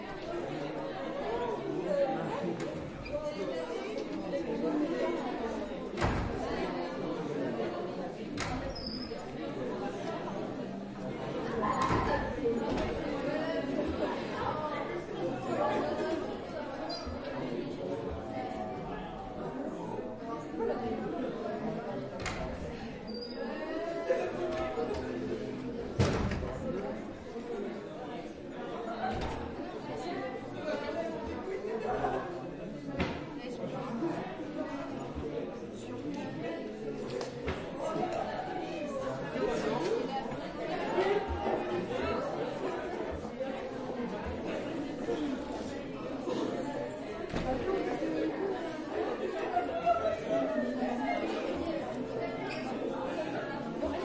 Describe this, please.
Queneau ambiance Hall Casier
prise de son fait au couple ORTF dans un hall de lycée, pas, casier scolaire
hall, people, crowd, field-recording, voices, foot